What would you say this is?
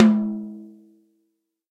this is tom sample of an 14" tom which i was messing around tuning to give different brightnesses and sustains
recorded with an sm57 directly on it and edited in logic
beat, hit, sample, tom